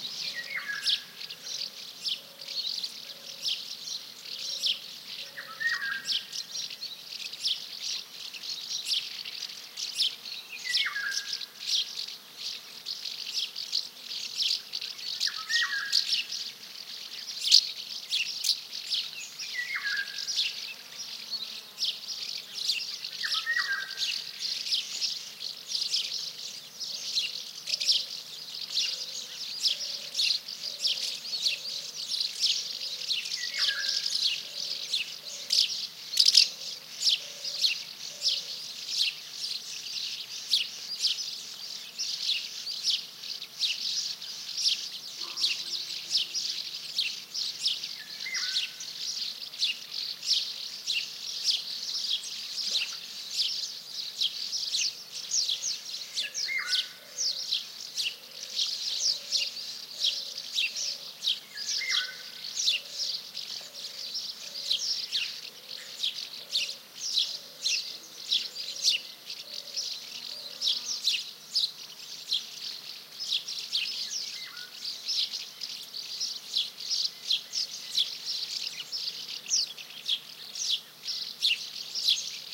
20090506.house.martins
Chirps from House Martins and House Sparrow, with one Golden Oriole singing in background. Recorded at a colony of birds on the bridge over Embalse Agrio Dam (Aznalcollar, Sierra Morena, S Spain). Sennheiser MKH60 + MKH30 into Shure FP24, Edirol R09 recorder. Decoded to mid-side stereo with free Voxengo VST plugin
ambiance, andalusia, birds, field-recording, house-martin, nature, oriole, oropendola, south-spain, spring